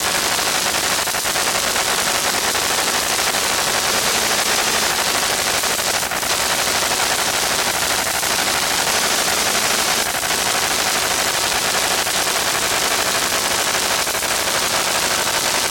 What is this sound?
GPU noise pickup coil [RAW]
Recording of a computer graphics card.
Captured closing using a "telephone" pickup coil and a Zoom H5 recorder.
It's always nice to hear what projects you use these sounds for.
GPU, coil, electric, electrical, electricity, electromagnetic, electronics, noise, noisy, pick-up, pickup, raw, recording, telephone-pickup-coil, unprocessed, waves